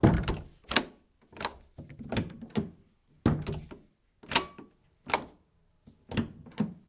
FX SaSc Door 19 Lock Berlin Flat Apartment Bathroom Opem Close 2 Versions
Door 19 Lock Berlin Flat Apartment Bathroom Opem Close 2 Versions